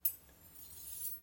8) Sword version 2
foley for my final assignment, metal "sword".
foley, knife, metal, sword